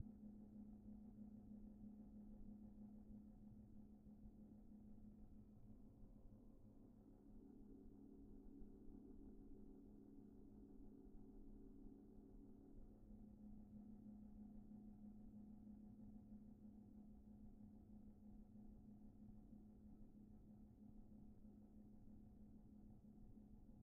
FX Ship Engine01
Quiet rumbling dryer noise; used for a ship's engine, could work for a spaceship.